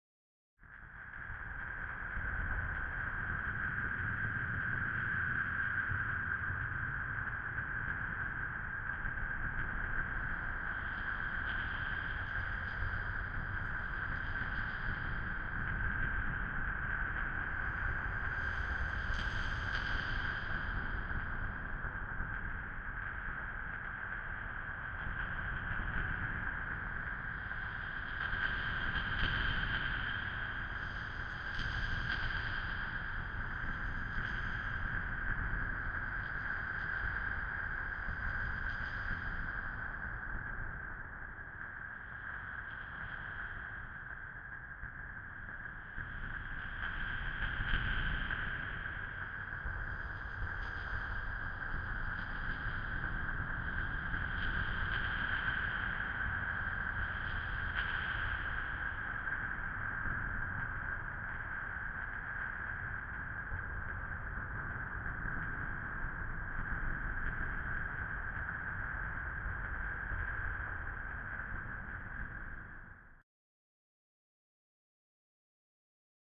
drone Space wind scifi
this is part of a drone pack i am making specifically to upload onto free sound, the drones in this pack will be ominous in nature, hope you guys enjoy and dont forget to rate so i know what to make more of
ambience, ambient, atmosphere, dark, drone, sci-fi, soundscape, space